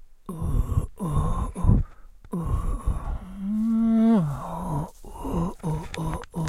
Hiroshima DT TE02 Top of Mt. Tenjo
Hiroshima, Mt-Tenjo, Texture